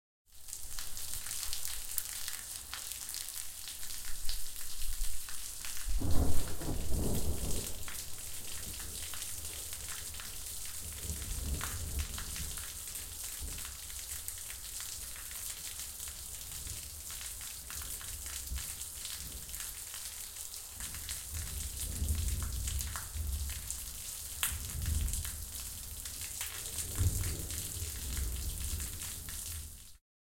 Thunder with water falling on cement, crackling bright sound of water hitting hard floor, spatial environment, ambience.